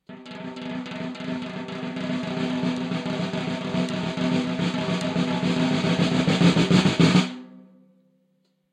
Snaresd, Snares, Mix (10)
Snare roll, completely unprocessed. Recorded with one dynamic mike over the snare, using 5A sticks.